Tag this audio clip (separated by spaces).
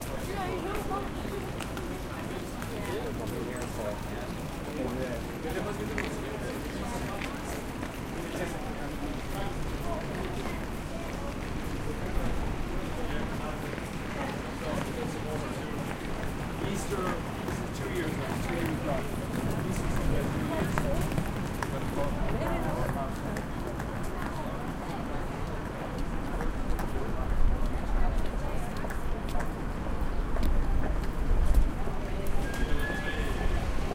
manchester piccadilly